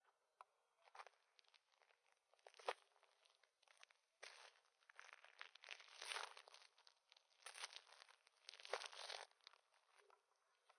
Gravel/Sand Walking 3
Walking on sand/gravel on concrete. Very crunchy. Recorded on a DR07 mkII in Southwest Florida. Some wind noise with crickets in the background.
If you can, please share the project you used this in.
running, walk, feet, summer, atmosphere, concrete, crickets, foot, step, ambiance, cement, ambient, run, gravel, crunch, steps, foot-steps, night, sand, footstep, shoes, insects, footsteps, walking, foley, ambience